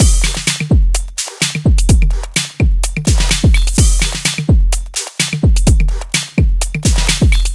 Created in Hydrogen and Korg Microsampler with samples from my personal and original library.Edit on Audacity.
beat, bpm, dance, drums, edm, fills, free, groove, hydrogen, kick, korg, library, loop, pack, pattern, sample